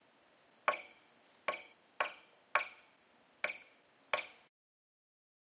metal laser
short audio file of a metal rod being struck against a large metal door which created a sound effect of a laser gun being shot
freaky, effect, space, metal, noise, oi, sci-fi, abstract, future, weird, gun, laser, science, sounddesign